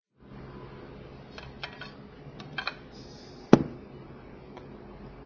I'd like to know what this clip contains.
botella sobre la mesa
botella, mesa, Sonidos